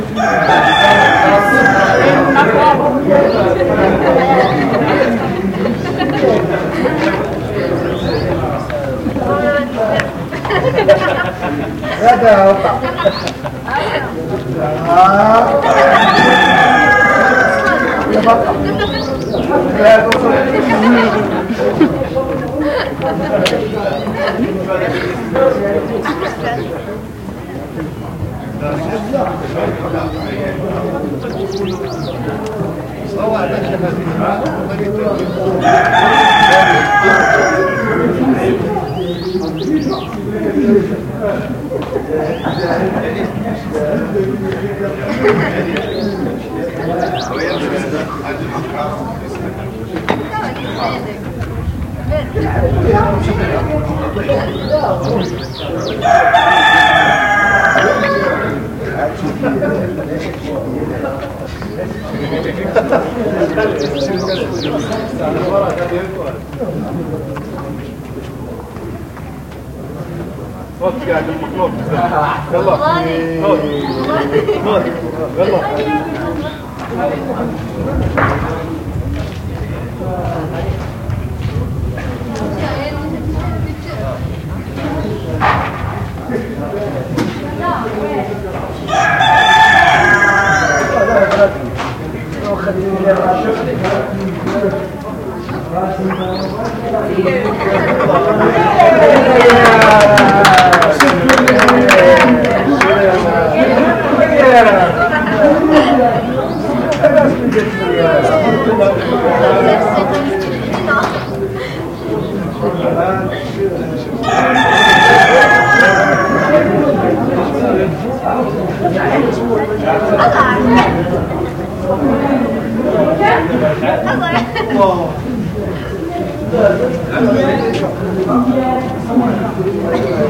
Ambiance Marché-Final
quiet market place, laughs, applause, young voices, arabic words
place, market